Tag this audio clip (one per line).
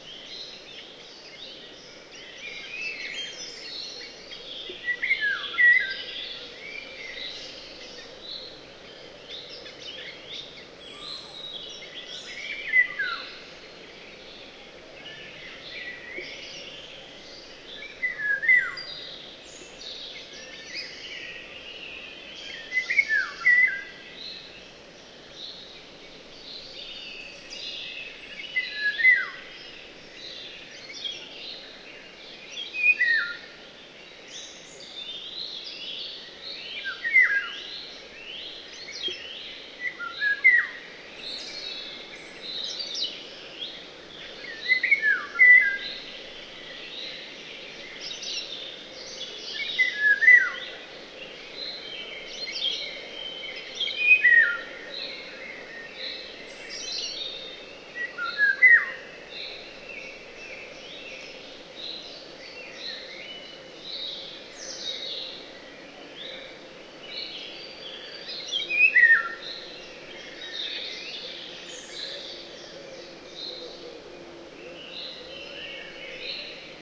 pirol
field-recording
forest
nature
oriole
bird
spring
birdsong